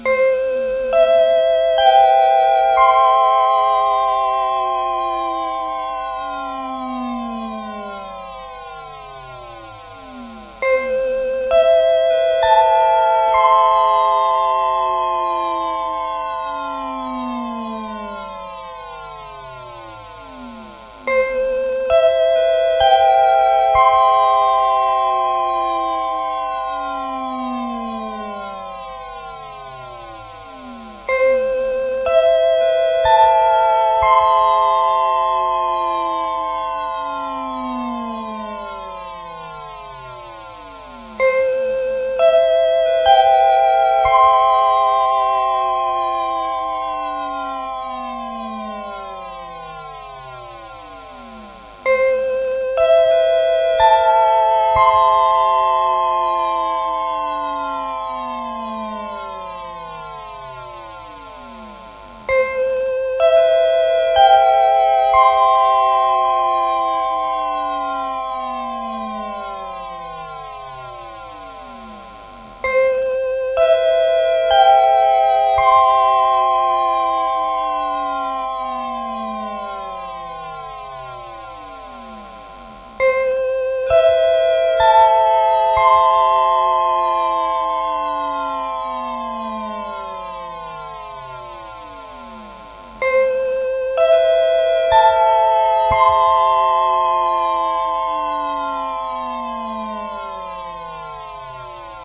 Boise, Idaho, has caught another signal, still believed to be a pause signal. This time more beautiful.If the signal really comes from Orion, then it was sent weeks ago!
alien, civilization, galactic, Orion, pause, signal, SPACE